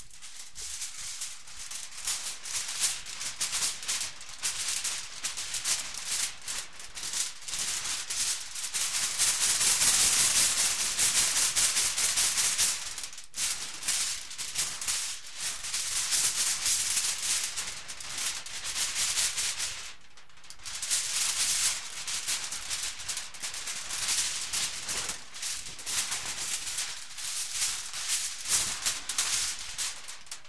Moving a smooth sheet of aluminium paper. // Moviendo una hoja de papel de aluminio liso, recién cortado de su rollo.
aluminium, aluminium-film, metalic, noise